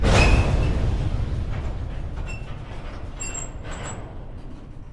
rasp train 001
the rattle of a freight train.
grab, rasp